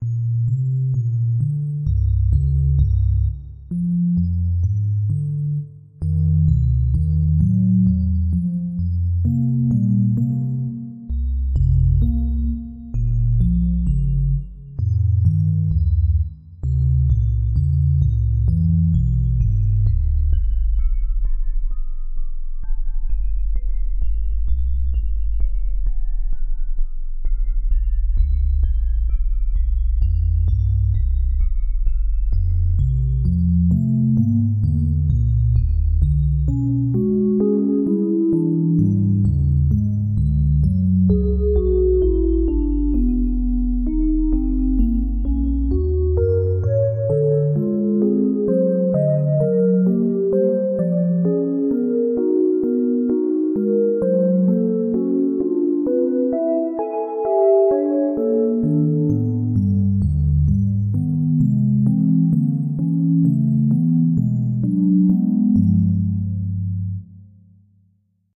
A little piano roll test in fl studio.
electronic, Epiphany, music